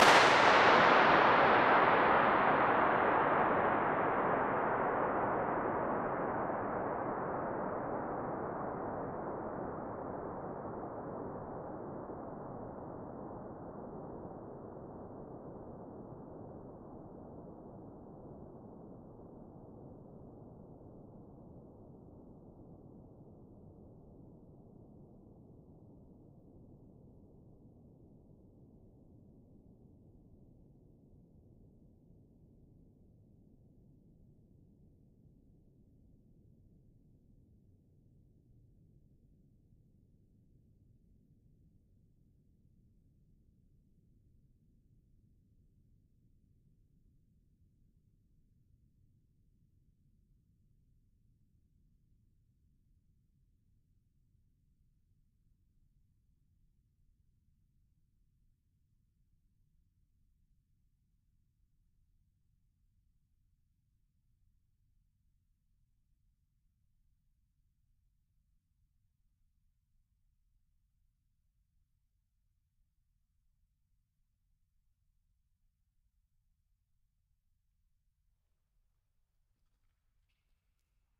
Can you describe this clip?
World's 'longest-echo' 6th impulse
Measured for Sonic Wonderland/The Sound Book, this is an uncompressed impulse response from the space which holds the Guinness World Record for the 'longest echo'. It is a WWII oil storage tank in Scotland. Impulse response measured using 1/4" measurement microphone and a starting pistol.
scotland, guinness-world-record, Salford-University, Allan-Kilpatrick, uncompressed, longest-echo, trevor-cox, rcahms, oil-tank, echo, tunnel, reverberation-time, oil-storage, sonic-wonderland, inchindown, the-sound-book, reverb, reverberation